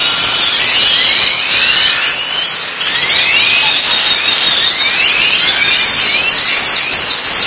Quiscalus mexicanus, birds in a Cancún city tree